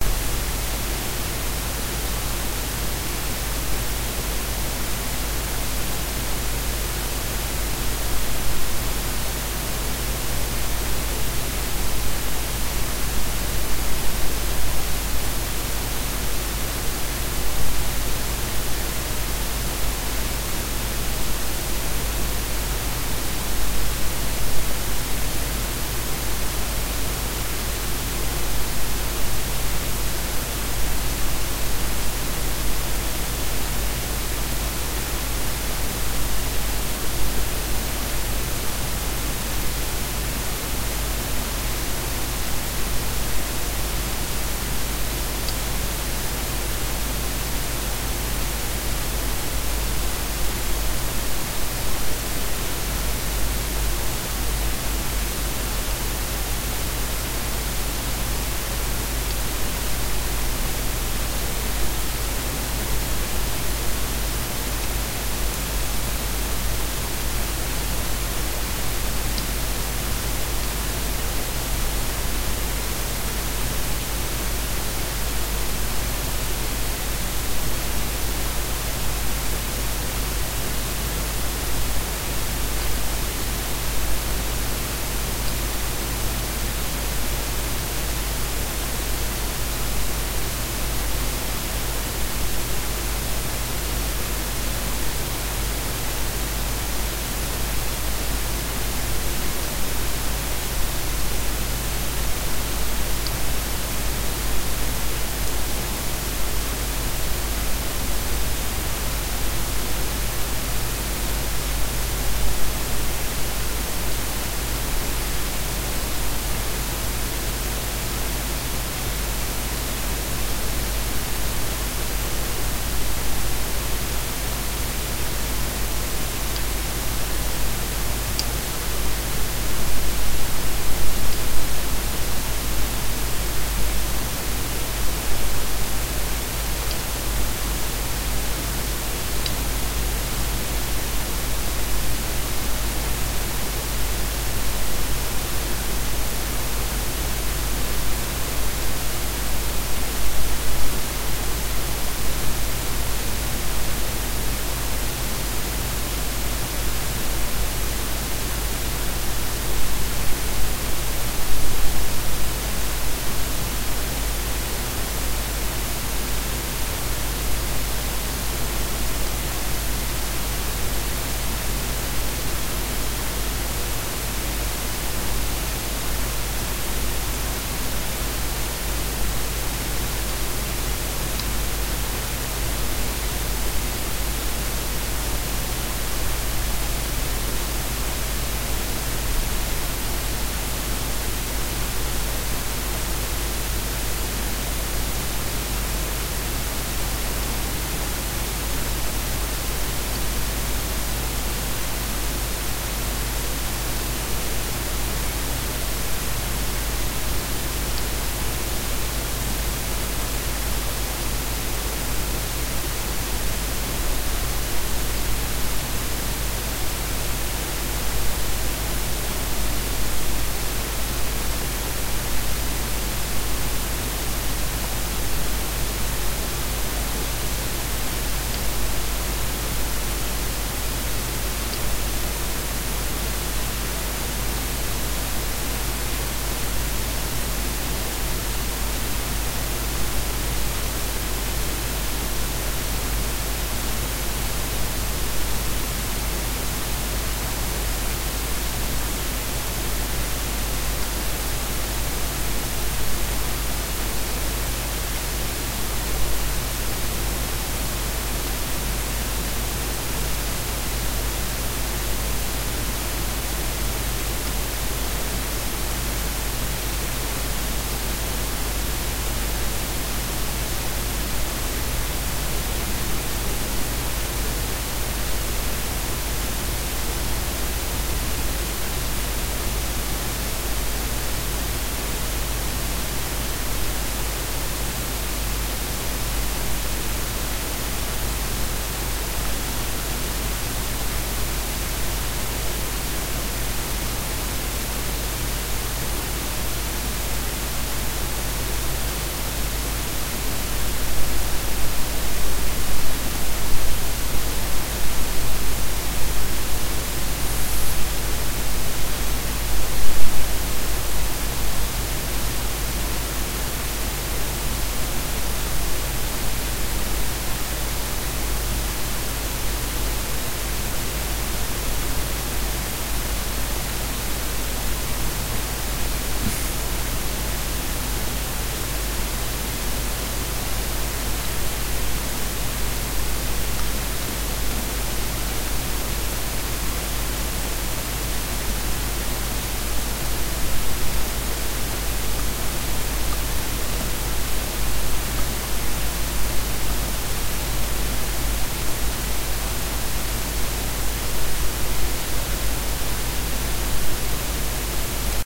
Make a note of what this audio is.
ECU-(A-XX)109+
Jitter, Efficiency, Lens, UTV, Rheology, Energy, Rack, Symmetry, Horizon, Reptile, Channel, Control, Iso, Map, Pilot, Track, Unit, Raspberry, Trail, Navigator, Mint, ATV, Dolphin, Shelf, Battery, Alveolus, Fraser, Mirror, Engine, Path